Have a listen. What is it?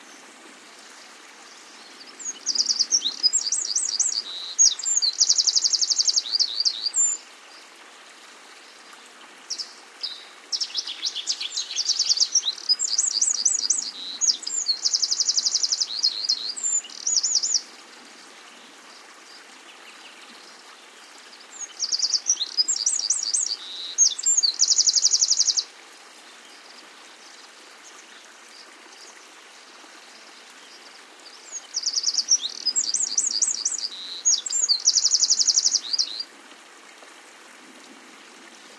20070504.Sierra.stream.03
A stream in Sierra Morena (S Spain), bird calls in background. Sennheiser MKH60+MKH30 into Fostex FR2LE, decode to M/S stereo with Voxengo free VST plugin
nature, field-recording, stream, water